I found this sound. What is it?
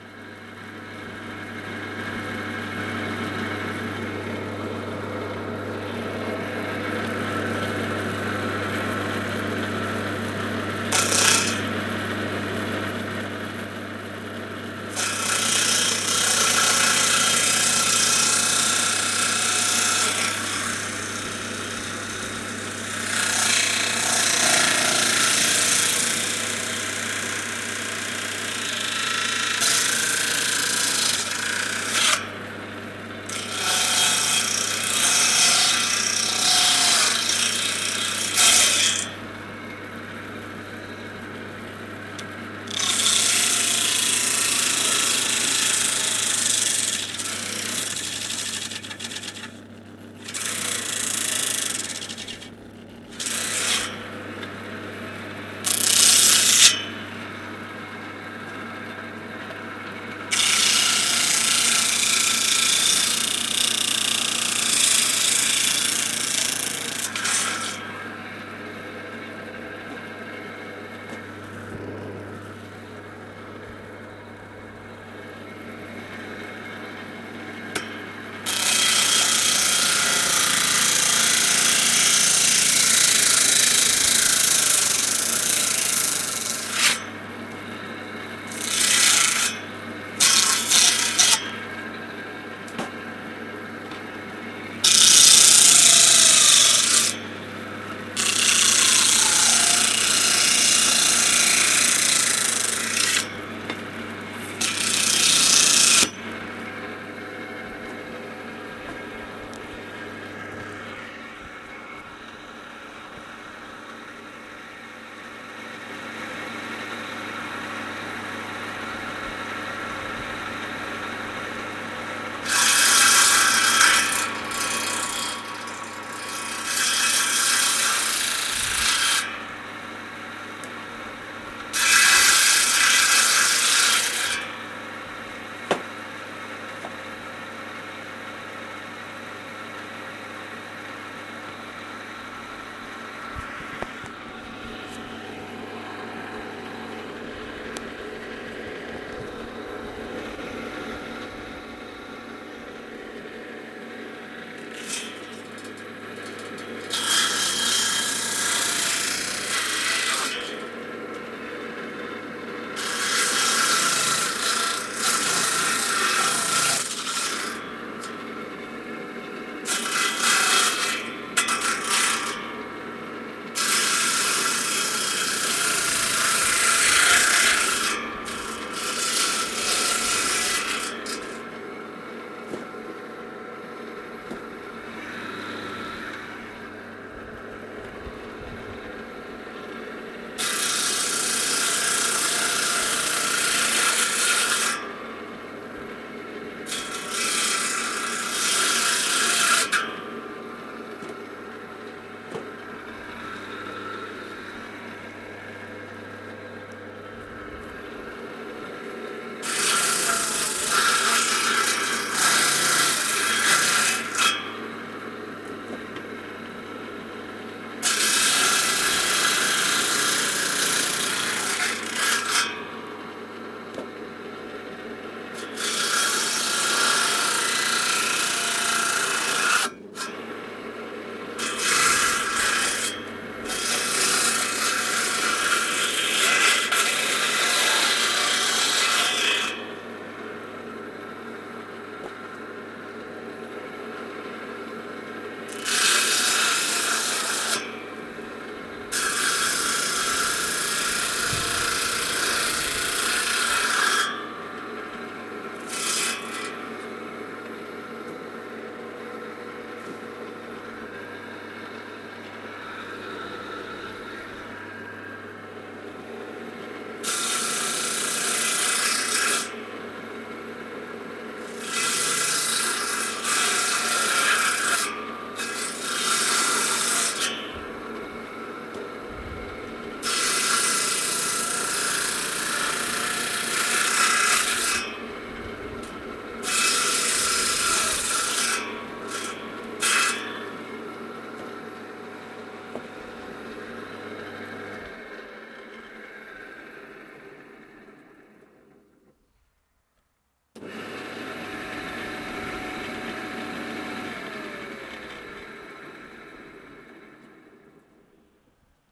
saw of avalon 2
recording of pieman's saw in somereset
field-recording, saw, somerset